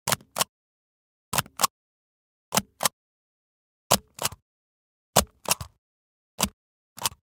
OFFICE SELF INKING STAMP ON PAPER 01
Self inking stamp pressing down on paper with various strength and speed.